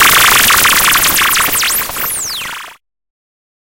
A long fading electronic sound effect for clicks'n'cuts. This sound was created using the Waldorf Attack VSTi within Cubase SX.